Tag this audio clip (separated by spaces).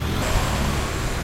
car engine motor SonicEnsemble UPF-CS12 vehicles